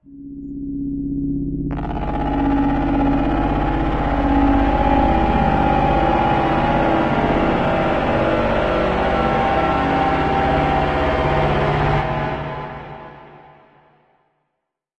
THE REAL VIRUS 07 - GIGANTIC - C1
Big full pad sound. Nice evolution within the sound. All done on my Virus TI. Sequencing done within Cubase 5, audio editing within Wavelab 6.
pad, multisample